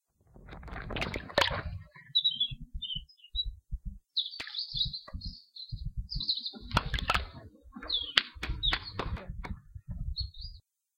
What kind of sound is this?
Parque da Cidade - Pássaro
birds, fiel-recording, ulp-cam